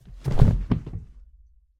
A few sound gathered from here compiled to make a convincing sound of a person falling on a wood floor.